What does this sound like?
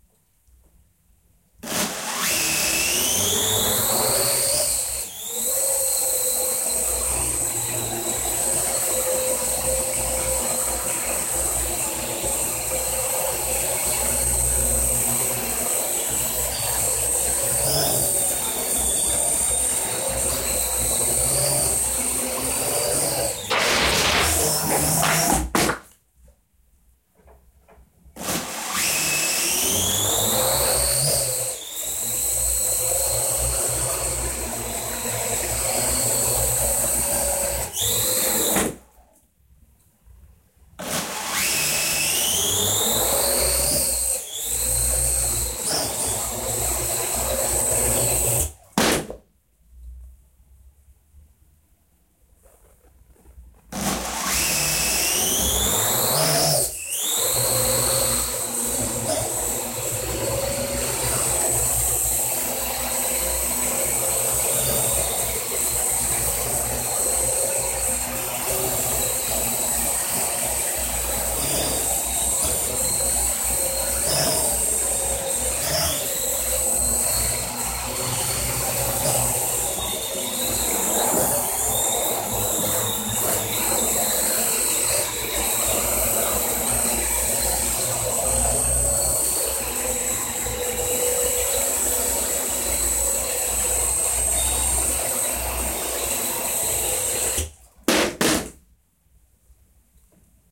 Flying a quad copter indoors. Recorded on a Wileyfox Storm phone. About 20 seconds in, some card is ingested into the rotor blades resulting in a crash.